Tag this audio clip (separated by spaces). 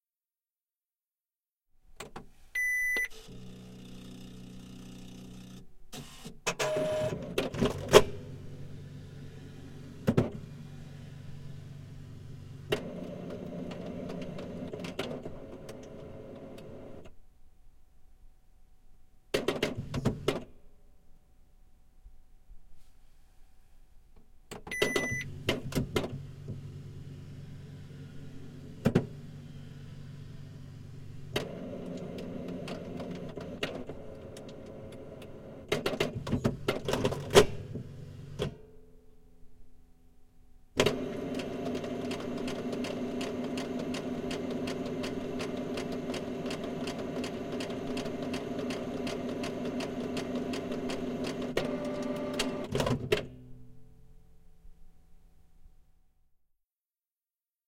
printer copier fax electronic machine computer